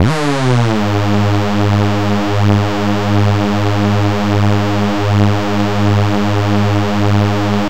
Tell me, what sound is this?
Fake hoover with detuned waves